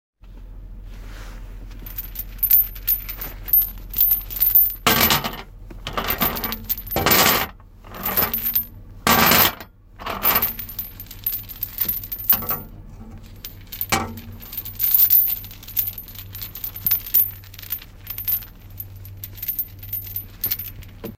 Small chain link (5-6) dropping on metal box (hollow)
chain, clinks, Free, metal, short